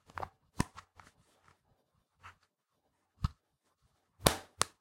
Opening and closing a plastic eyeglass case. Recorded in Samson Go Mic. Post-processed in Audition.
Recorded by Joseph